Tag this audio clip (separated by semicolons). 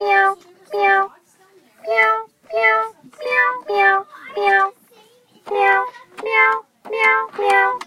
s-toy; cat; Chromatic; child